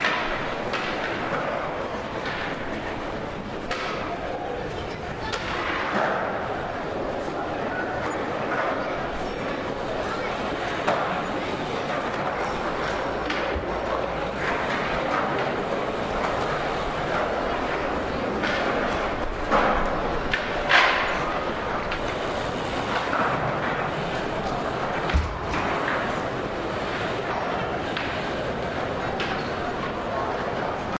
Skatepark snippet
One of a selection of modest recordings, gathered whilst on an exchange with the British Library Sound Archive.
Field recording taken at the South Bank skate park along the Thames, London on 28th May 2013.
Park is housed is concrete - ceiling floor and inner columns. Natural reverb and quits a few low resonances. Crowd sounds and traffic rumbles - a few sirens. Low resonances have been left in, for each individual to EQ as they wish.
Crowd, Field-recording, London, Rode, Skateboard, Southbank, Stereo, Thames, Traffic, Zoom